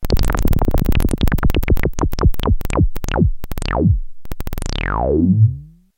analog burbles
Deep and noisy weirdness from a Nord Modular synthesizer.
nord, synth, splat, modular, weird, digital, sound-design, synthesis